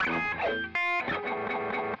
Randomly played, spliced and quantized guitar track.